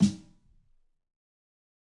Fat Snare EASY 002
This is The Fat Snare of God expanded, improved, and played with rubber sticks. there are more softer hits, for a better feeling at fills.
drum, fat, god, kit, realistic, rubber, snare, sticks